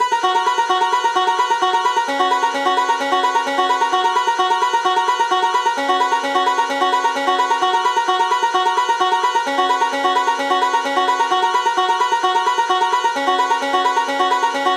Fake Mandolin
Triphop/dance/beat/hiphop/glitch-hop/downtempo/chill made with flstudio12/reaktor/omnisphere2
bass, beat, down, electro, glitch, Hip, instrumental, tempo